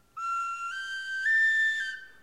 Flute from the iron age
iron, age